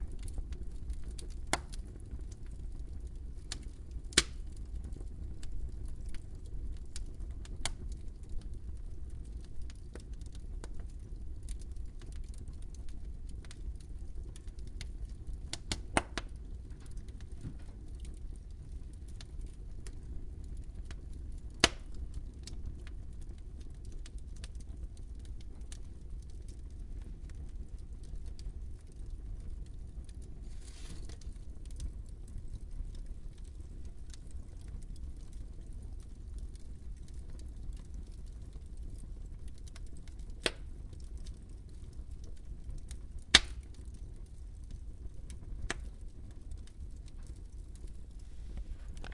The sound of a fire in our camin.